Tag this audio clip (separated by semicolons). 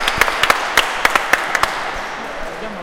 aplauso; basket; field-recording